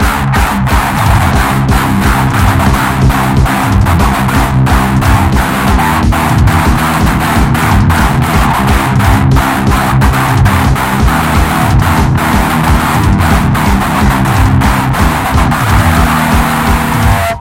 Thrash Metal Loop
An in your face metal loop recorded directly from my amplifier, the drums are made by me with Rhythm Rascal.
Power, Heavy, Thrash, Drums, Metal